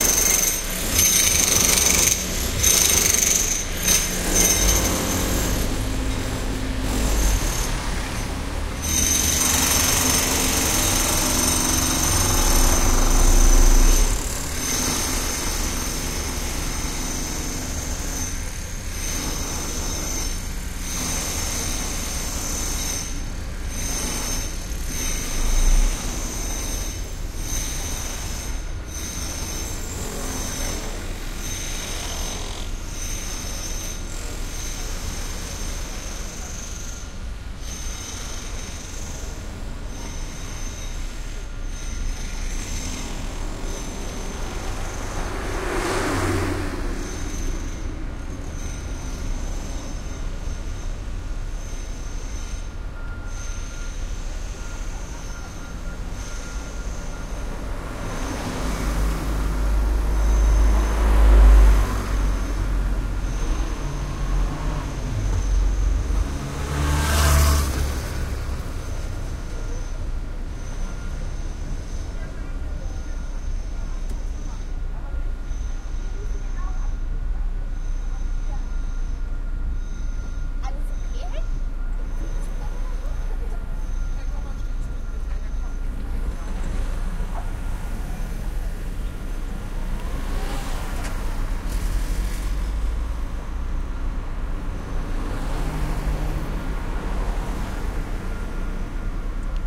JACKHAMMER Tascam DR-05
just passed a construction area where they were using two jackhammers directly at the street - very loud but very clear too in this recording - hopefully useful for some of you!
Jackhammer, Builder, driveby, DR-05, Tascam, construction, Loud, area